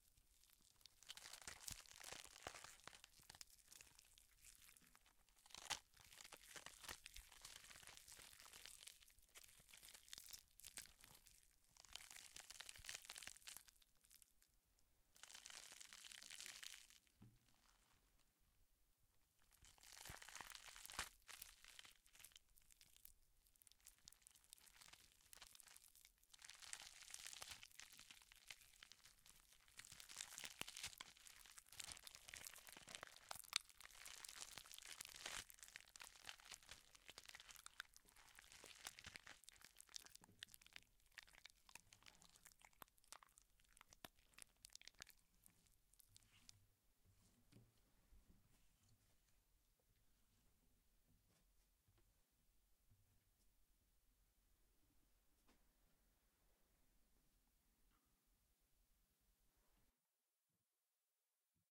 Gore loop
Flesh, intestines, blood, bones, you name it.
blood, flesh, gore, intestines, tear